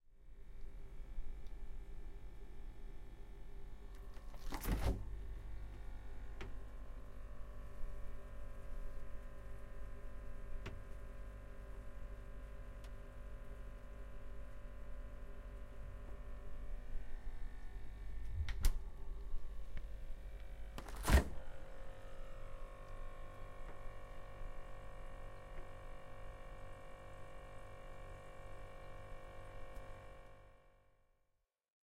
The office fridge (which was strangely empty) humming and doing its business.